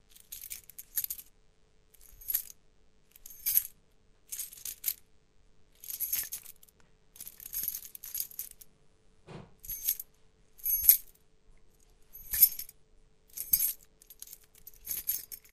Tossing a keychain up and down.
key, metal, stereo, keys, scramble, chain, keychain, toss